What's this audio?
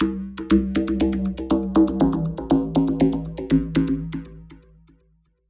raveytomtomarp120bpm
loop analog synth 120bpm acid bleeps techno trance arpeggio